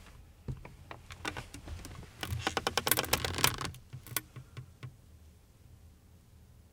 chair sitting 2
By request.
Foley sounds of person sitting in a wooden and canvas folding chair. 2 of 8. You may catch some clothing noises if you boost the levels.
AKG condenser microphone M-Audio Delta AP
wood, creek, soundeffect, chair, sit, foley